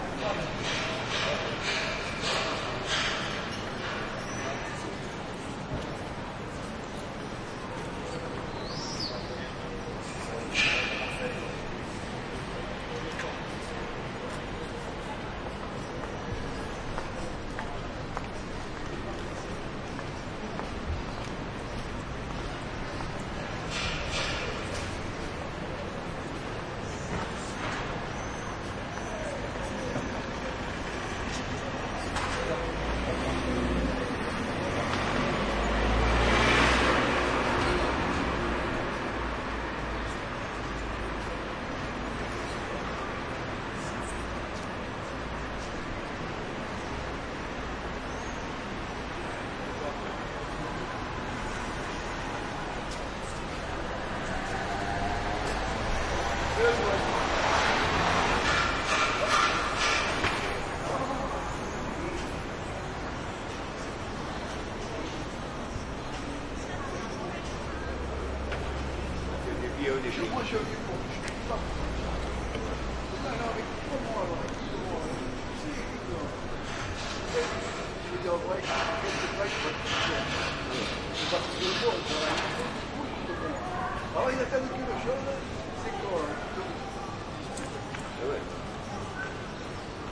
city square calm light traffic construction metal banging bicycle Marseille, France MS
calm, construction, France, light, Marseille, square